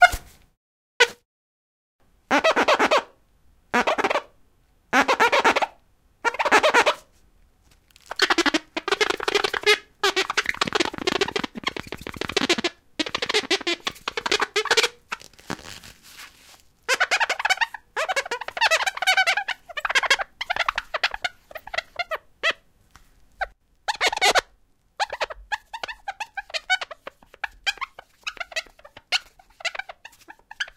Swiping Glass
Touchscreen device + paper towel + rubbing alcohol = music. Recorded with a Zoom H2.